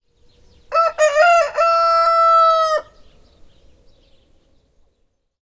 Rooster crowing
field-recording, Rooster, ambience